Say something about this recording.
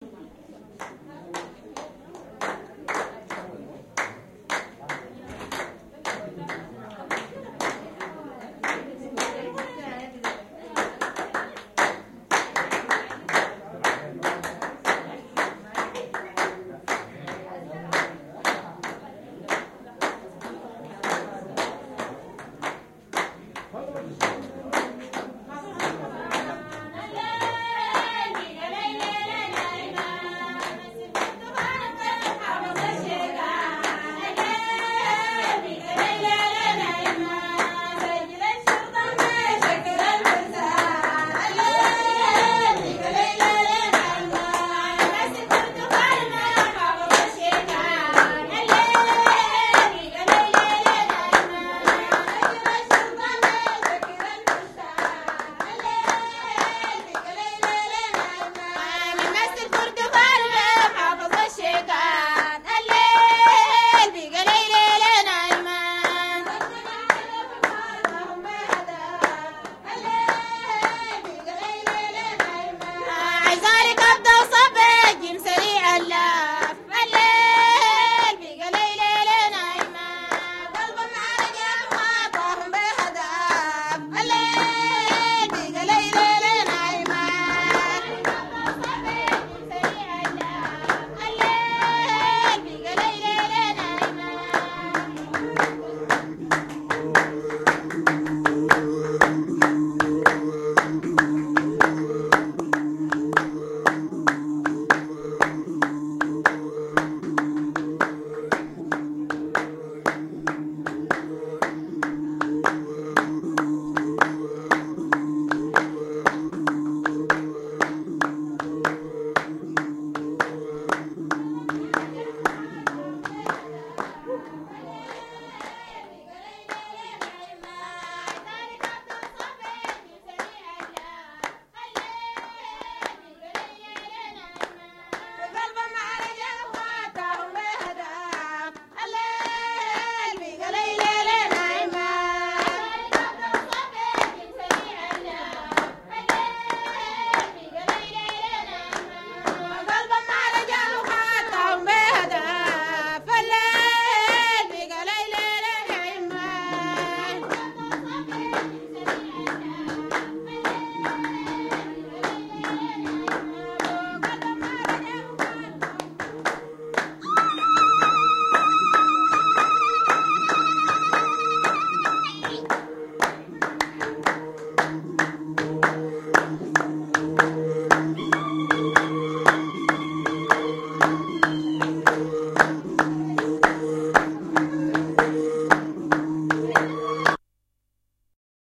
SUDAN SONGS EL OBEID
Mono. Recorded with basic Uher tape-recorder. In El-Obeid, Sudan, women and men (Zandé ?) sing several types of songs.
voices, clapping, field-recording, songs, Sudan, Soudan, people, guttural